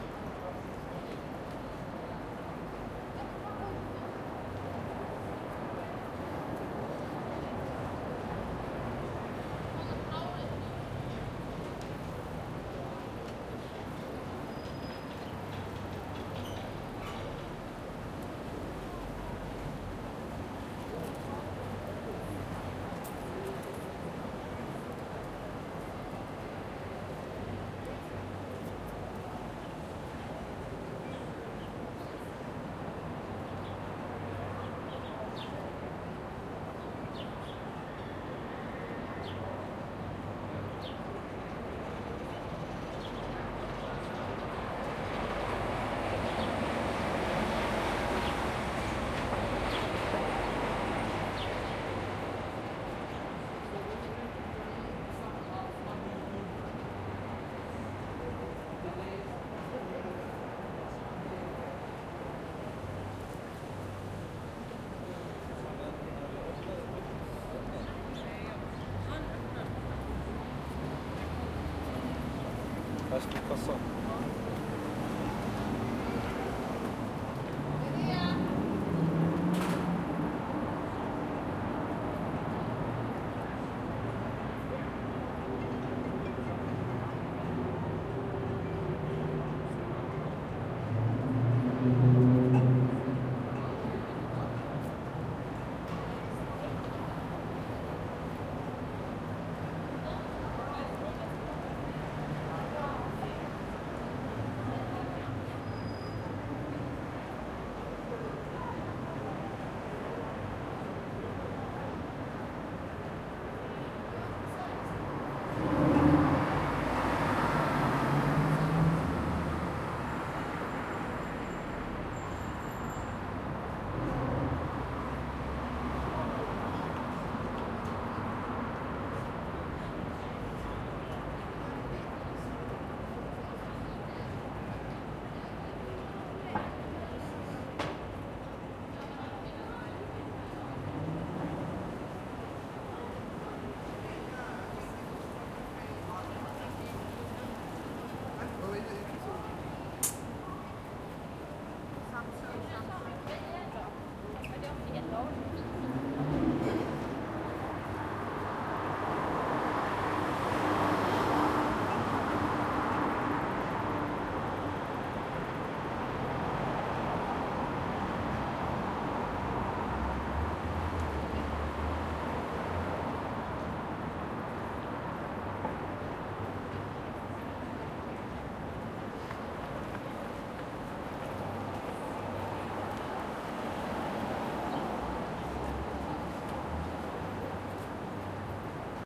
170717 Stockholm Bibliotheksgatan F
A small park at the end of the Bibliotheksgatan in Stockholm/Sweden. It is a sunny afternoon and there is a fair amount of pedestrian and automobile traffic underway. A few pedestrians are waiting at the bus stop adjacent to the park, automobile traffic features buses arriving, stopping and driving off, and, for some reason, a large amount of expensive sports cars...
Recorded with a Zoom H2N. These are the FRONT channels of a 4ch surround recording. Mics set to 90° dispersion.
ambience, bus, city, Europe, field-recording, people, Stockholm, stop, street, surround, Sweden, traffic, urban